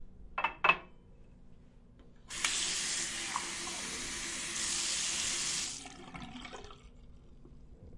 Glass water
Filling a glass with water
field-recording, glass, H6